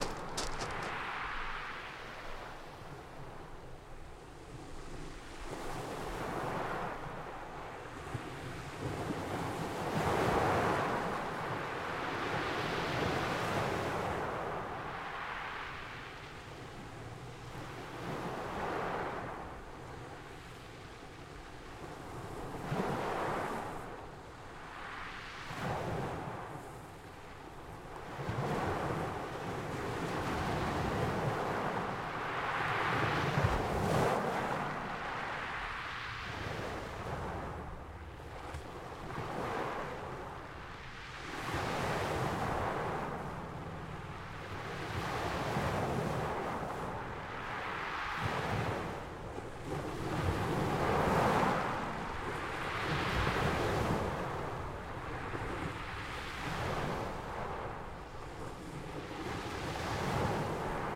ocean waves at the shore - take 01
waves, surf, ocean